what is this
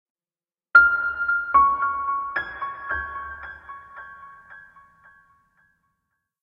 Mellow piano phrase, 4 notes, part of Piano moods pack.